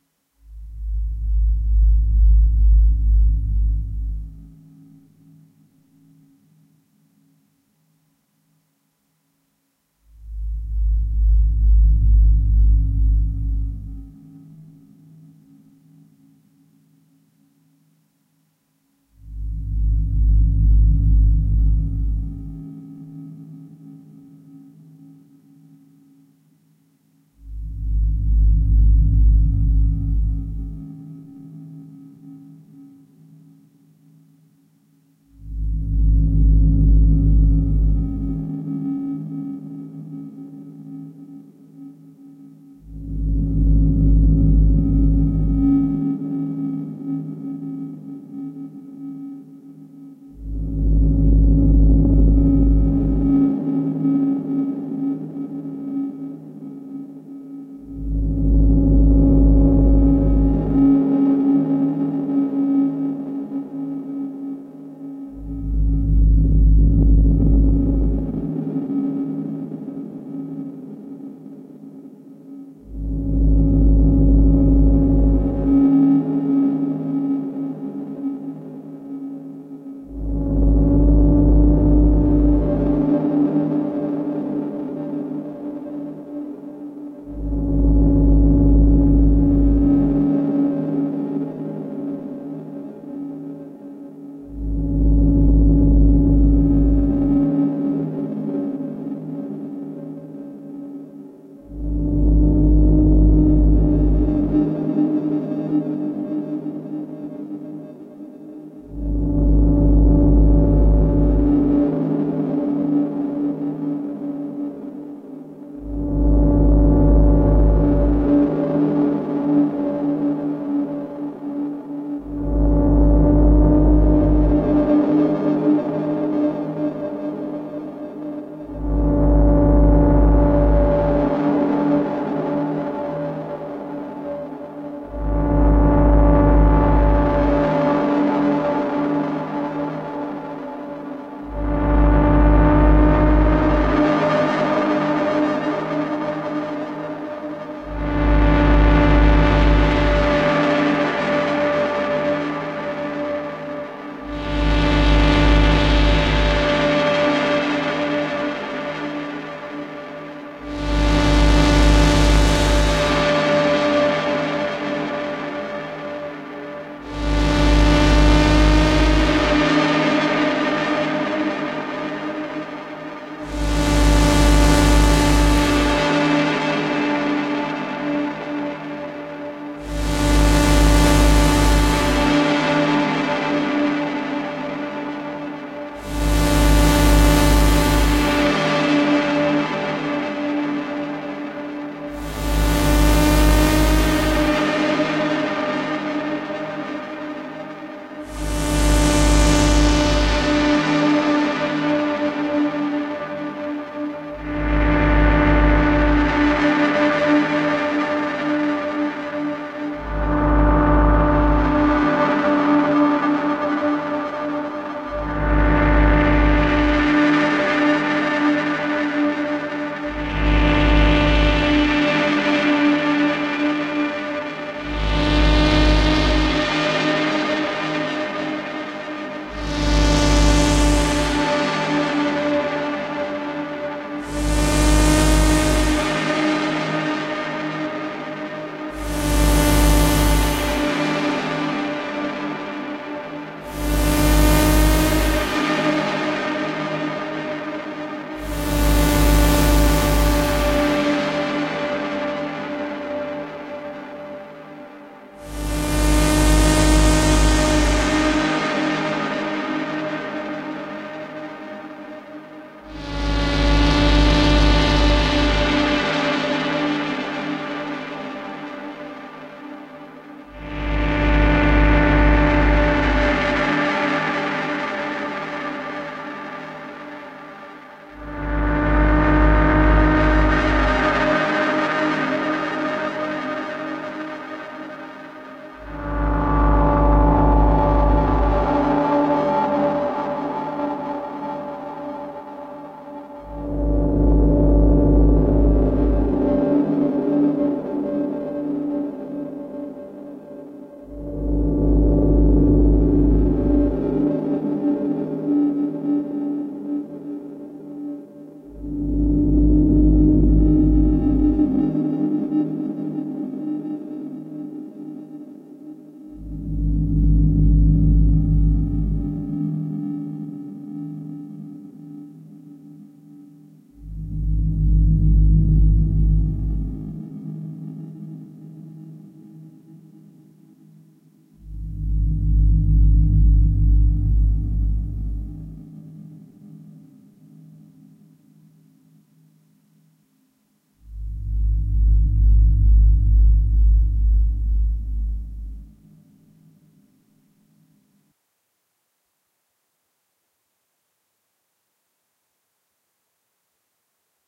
Dark Pulsing drone
Pulsing drone sound i made on a my Behringer Model D analog synthesizer, recorded with reverb and delay effects in Ableton Live. Some processing was done later in Adobe Audition to finalize this sound.